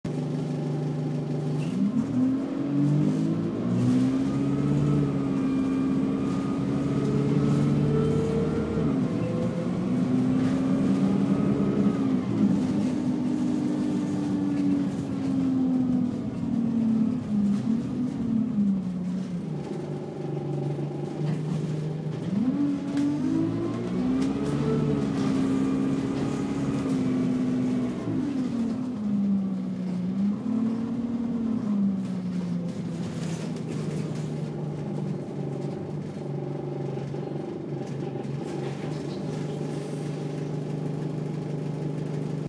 Inside Moving Bus
transportation, public, vehicle, field-recording, engine, transport, bus